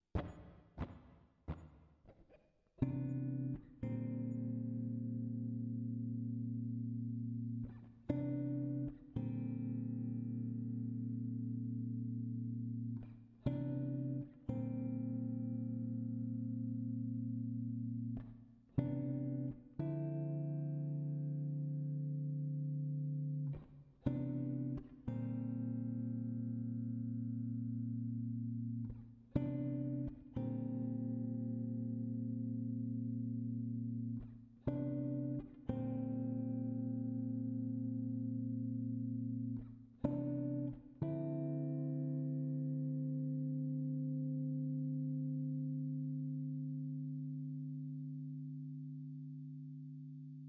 Guitar Chord Progression
A more down tempo chord progression
Vox, Guitar, Ibanez